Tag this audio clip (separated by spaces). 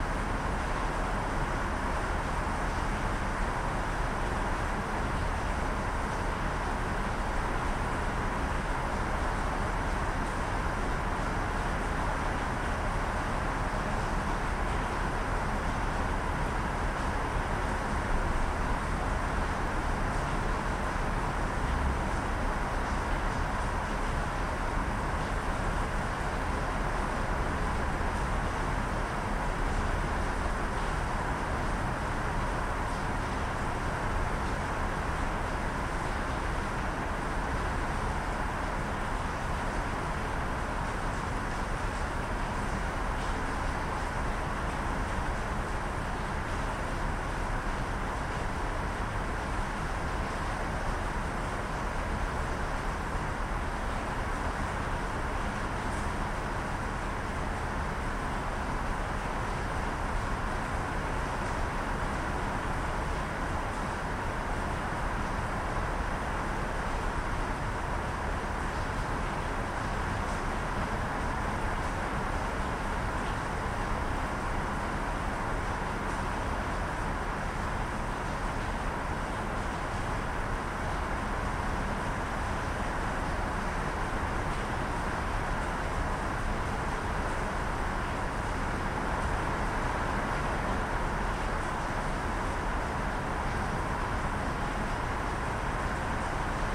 thunderstorm shower nature thunder rain weather lightning storm